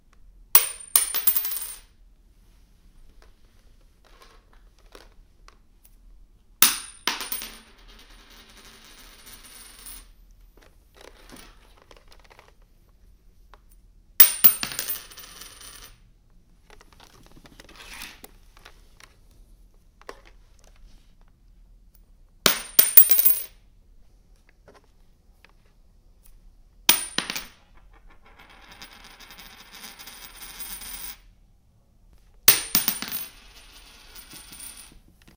dropping a quarter on wooden floor
currency, metal, change